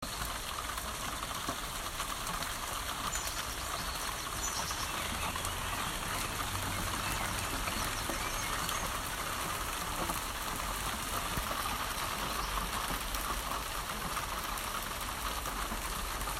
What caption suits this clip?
Rainroof outside
rain on shed roof exterior